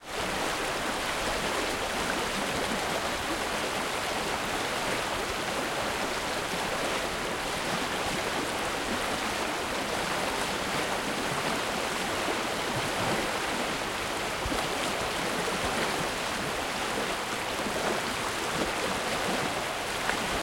River rapid Camp Blommaberg 4
Recording of a small rapid in the river Voxnan in Sweden.
Equipment used: Zoom H4, internal mice.
Date: 14/08/2015
Location: Camp Blommaberg, Loan, Sweden